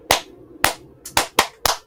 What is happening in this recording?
5 claps for BINGO song
sing famous kid songs with Alex Nevzorov's clapping sounds from right here!